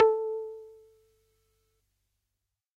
Pluck Single A DRY PSS560
This is a plucky synth sound created with the Digital Synthesizer section of a Yamaha PSS560. The stereo Symphonic and vibrato modes were ON.
This is part of a sample pack of Yamaha PSS560 drums and synth sounds. I would love to check it out!
Yamaha, PSS560, synth, pluck